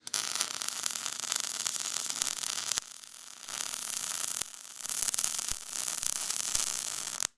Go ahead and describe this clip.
The sounds of welding
factory, field-recording, welding, machinery, industry